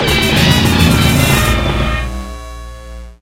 $417.293 bpm 360 McBenderfudge2Pandaballoon
avant-garde, experimental